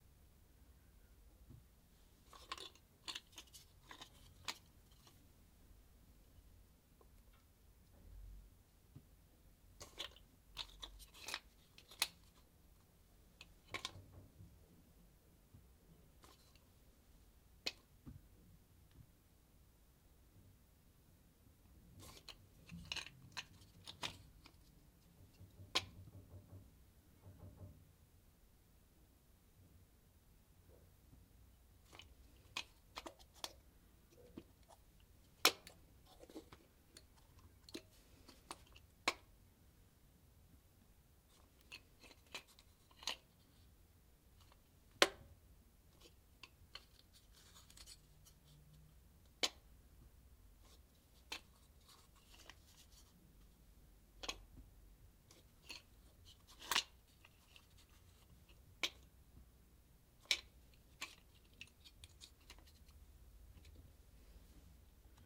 The sound of someone moving a very small box on the floor (or a table).